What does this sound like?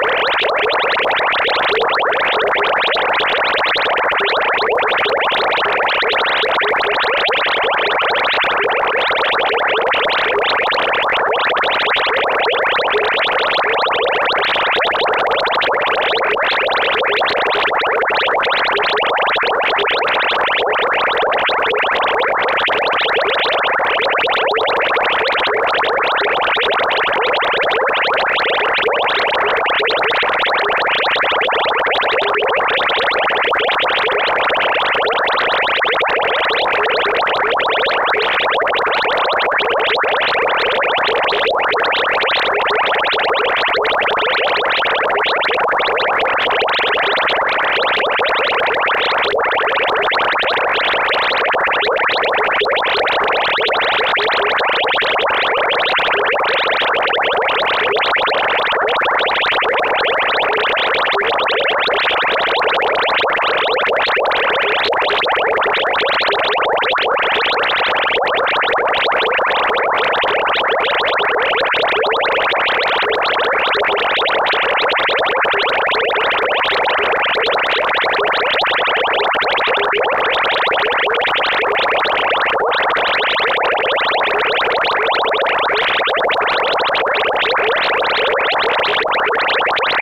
boiling bubbles
testing new generator. this one seems to imitate boiling or bubbles of some sort.
generator, drone, experimental